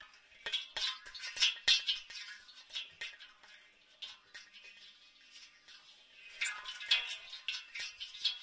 dabble and plashin near a boat or breakwaterd
buoy, matal-drum, water